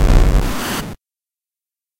STM1 some bass 2
Over processed deep bass. A little static. Variation of some_bass_3.
bass, distortion, static